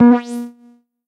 Transition sound from one screen or menu to another, could be used for game sounds.